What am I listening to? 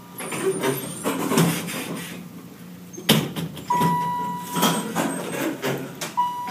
elevator closing and opening
Dover Impulse Traction elevator opening and closing
Recorded in 2012 with an iPhone 4S.
open, elevator, doors